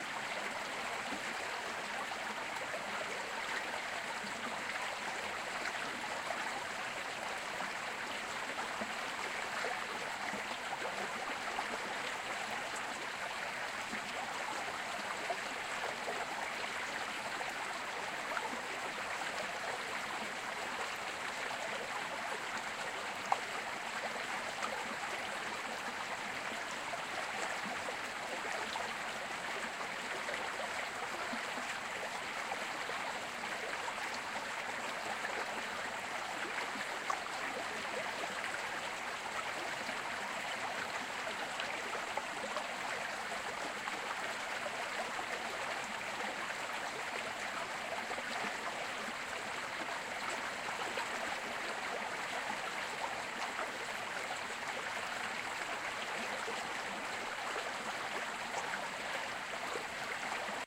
torrent Besseyres 5
water streams recordings
recordings, streams, water